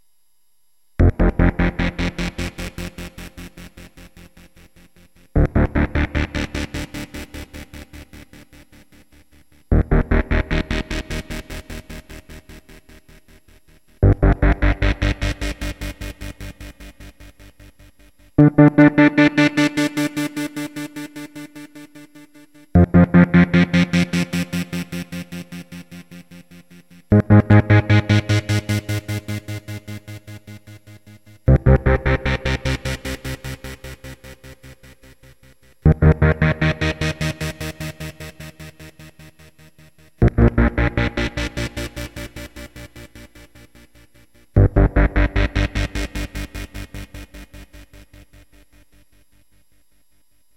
synthepad from the yamaha an1-x
an1-x, synthepad, yamaha